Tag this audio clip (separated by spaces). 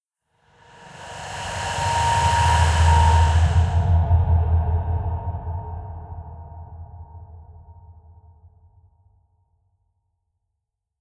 air breath death devil evil fear film ghost hell horror moan mystery paranormal scary sigh thriller